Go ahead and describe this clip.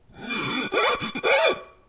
Washing a pyrex baking dish in soapy water, emphasizing the resonant qualities of fingers against wet glass. Recorded with a Zoom H2 in my kitchen. The recordings in this sound pack with X in the title were edited and processed to enhance their abstract qualities.